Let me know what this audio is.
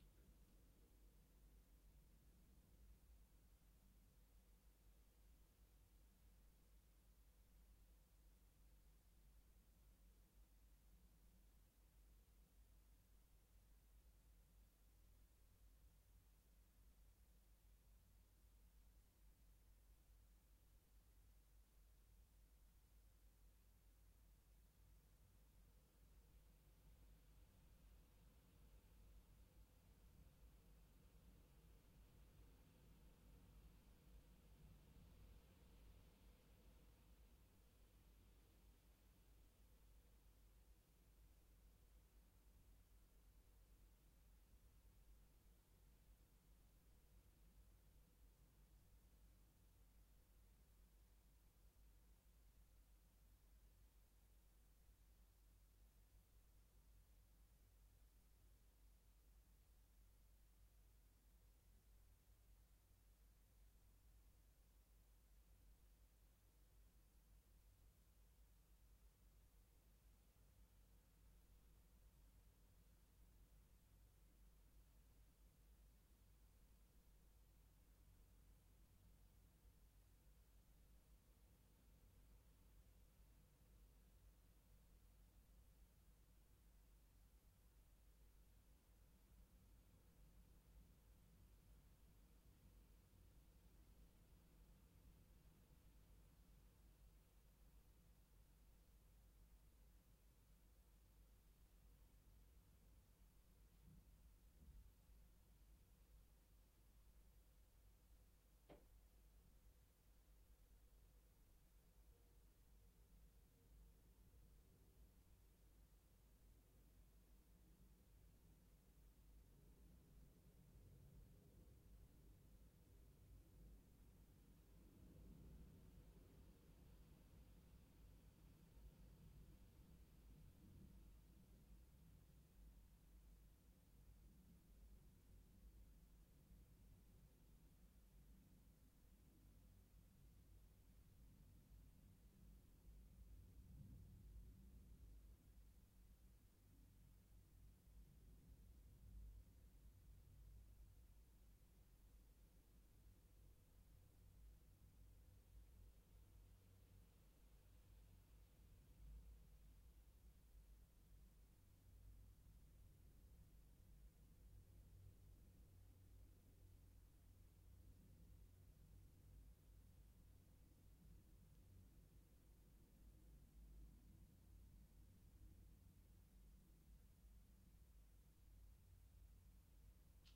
Interior bedroom apartment night room tone roomtone
Very windy night in Los Angeles. Recorded this inside my bedroom, with closed windows. You can faintly hear the wind and some airplanes go by.
Rode NTG2 and Zoom H4N